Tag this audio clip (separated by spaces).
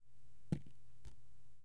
water drip paper dripping drops drop